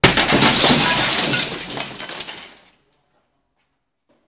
Windows being broken with vaitous objects. Also includes scratching.
indoor, breaking-glass, window, break